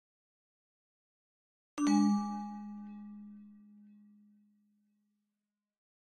notification 3 (bad)
game sound for a negative action
bad, game, notification, ping